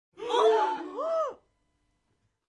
shock-reaction of a group